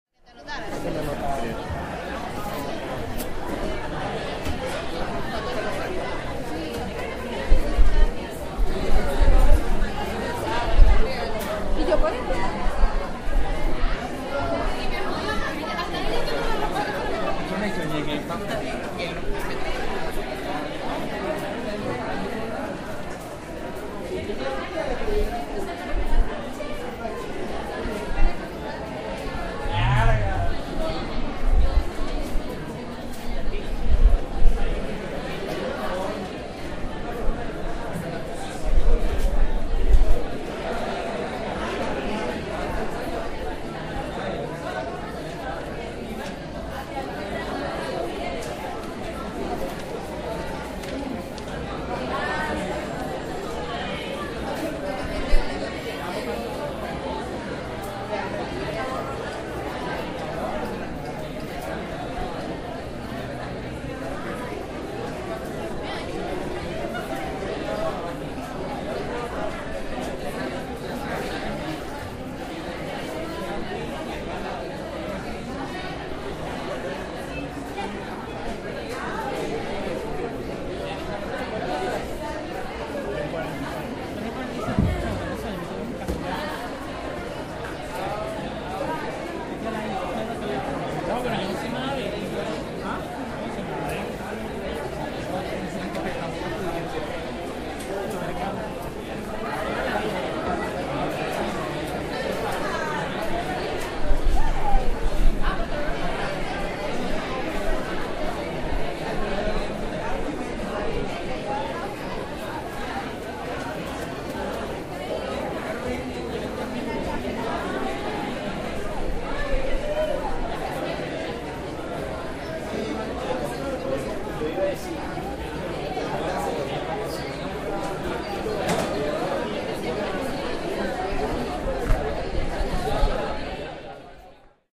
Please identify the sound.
Universidad Catolica Andres Bello Caracas. Cafe
UCAB Main Cafe. Spanish speaking students. Crowded. Recorded with Zoom H4.
Caracas, Bello, Montalban, Andres, Catolica, Cafetin, Universidad